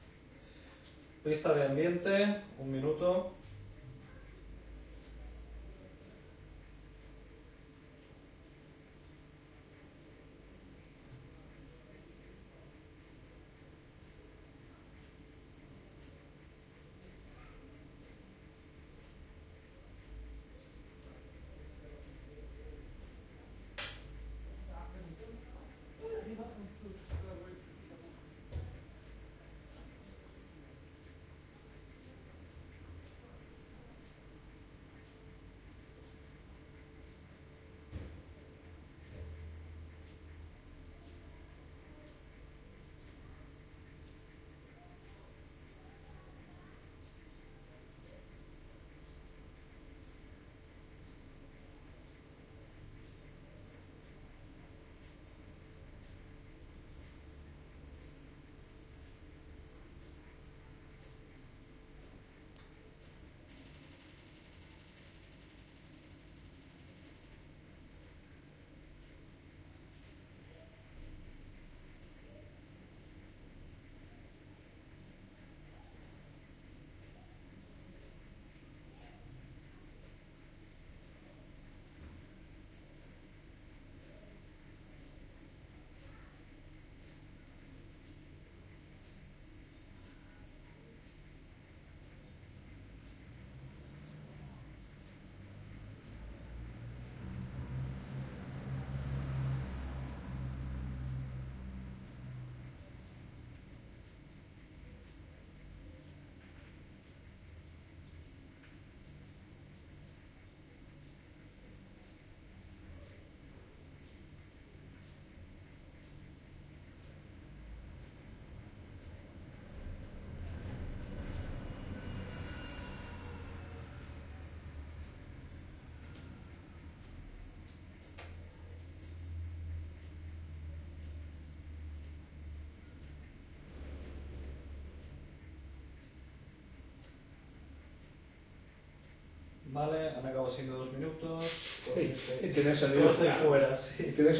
indoors ambient room tone
indoors, ambient, room, tone